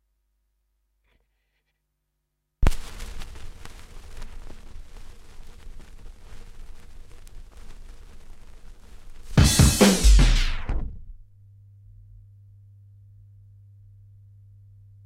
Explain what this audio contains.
Vinyl crackle and hum.
hum, vinyl